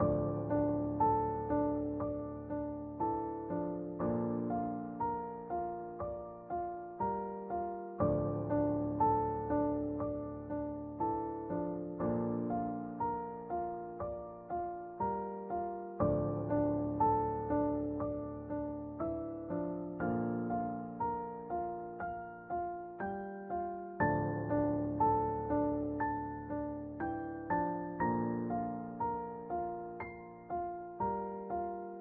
PNO Loop
Sad, soft piano loop. Loop was created by me with nothing but sequenced instruments within Logic Pro X.
dark, loop, loops, music, piano, sad, solo